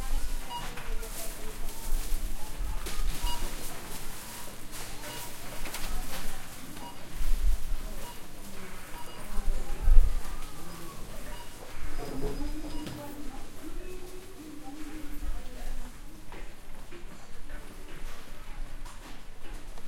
AMB Supermarket BCN
Supermarket interior with beep and people
Ambient, Barcelona, beep, Supermarket